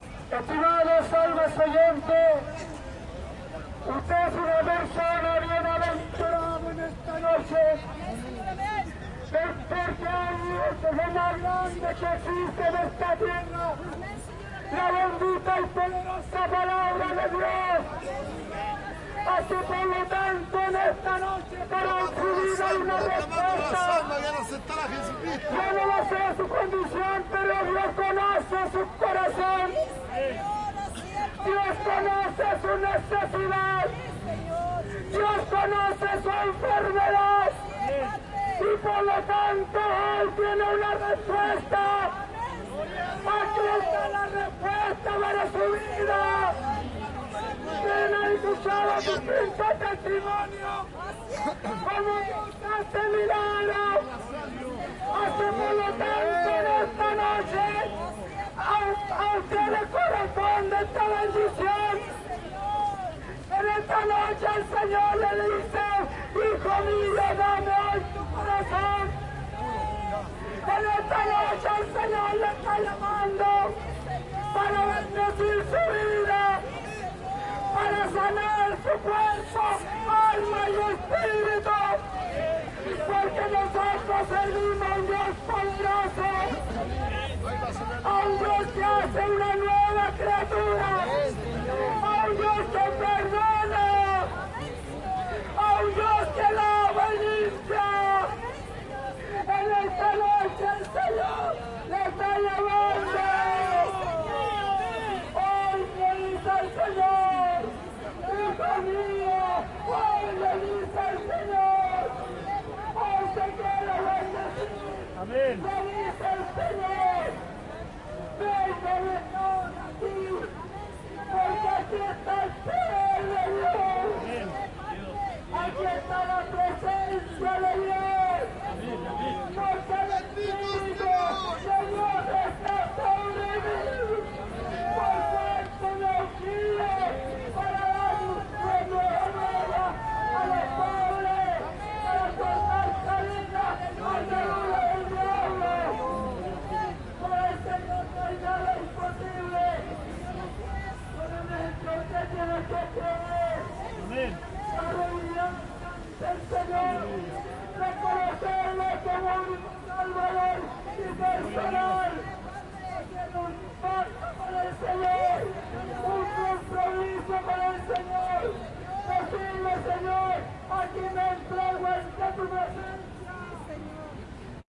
evangelicos 04 - revelaciones de la noche (gritos)
Evangelicos cantando y proclamando en Plaza de Armas, Santiago de Chile, 6 de Julio 2011.
Gospel singers in Plaza de Armas, Santiago of Chile.
park, de, evangelicos, gospel, santiago, armas, chile